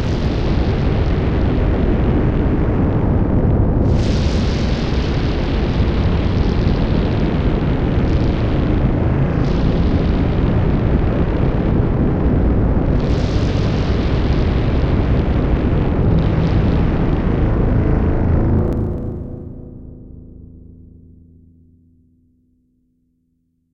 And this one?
A very wet sounding distortion with several bursts of volume and treble. A fair amount of reverb is also present. This sound was generated by heavily processing various Pandora PX-5 effects when played through an Epiphone Les Paul Custom and recorded directly into an Audigy 2ZS.